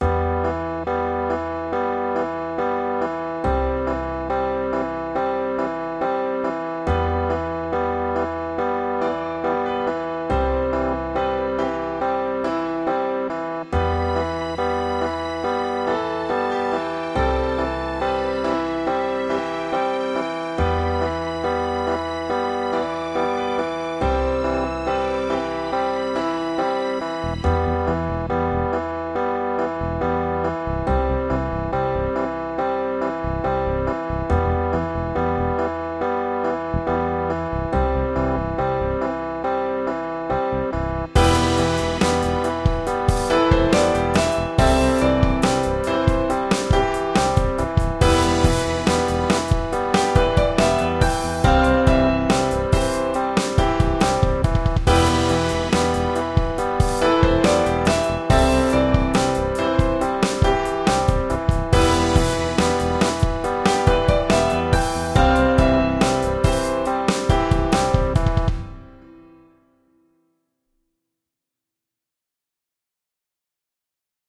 An "intro" version of my instrumental "bunny hop". Has more energy than the original. Cut and crafted to be used as a sort of intro or transition music for film, documentary, podcasts, etc.